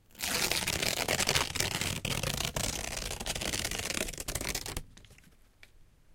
rustle.paper Tear 1
recordings of various rustling sounds with a stereo Audio Technica 853A